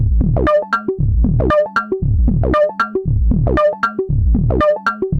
Some recordings using my modular synth (with Mungo W0 in the core)